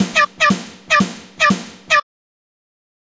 Sincopa alta (e1)
rhythm, Sincopa, percussion-loop